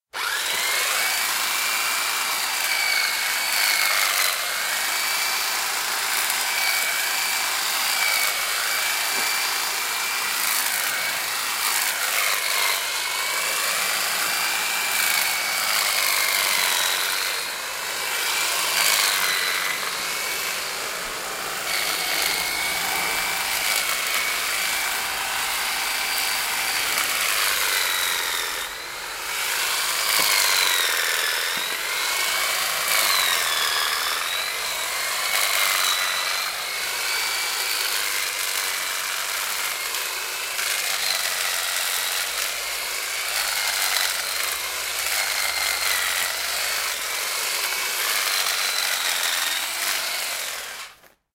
Electric Hedge Trimmer
Recording of a Bosch hedge trimmer cutting back a Holly bush. I used a Roland R26 to record this.
electric-bush-trimmer, hedgecutter, hedge-trimmer, Electric-hedge-trimmer, electric-hedge-cutter, bush-cutter, Bosch-hedge-trimmer